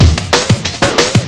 Experimenting with beats in analog x's scratch instead of vocal and instrument samples this time. Wobbling platter syndrome.